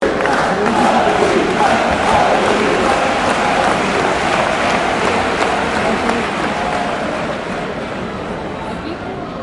The sound of a football match in a stadium.
game, goal, fans, football, sport, stadium, match, SLB, benfica